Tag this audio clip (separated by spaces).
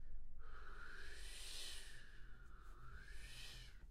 gust wind windy